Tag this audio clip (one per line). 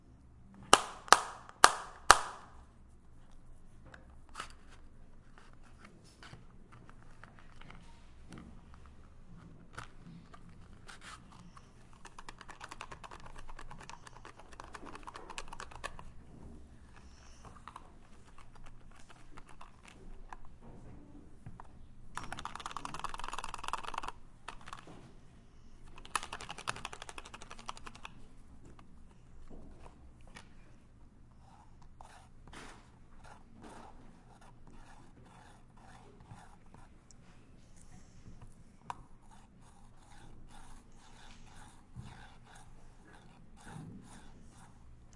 2013
Lamaaes
TCR